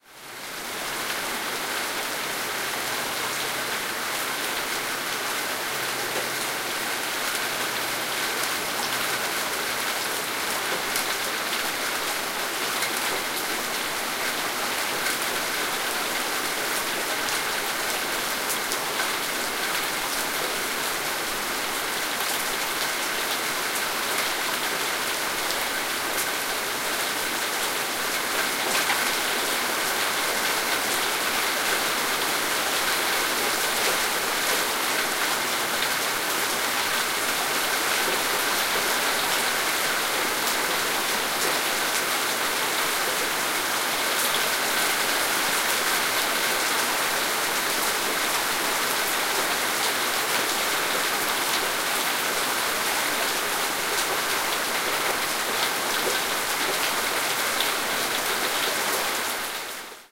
Raw audio of moderate rainfall in Callahan, Florida.
An example of how you might credit is by putting this in the description/credits:
The sound was recorded using a "H1 Zoom recorder" on 8th August 2016.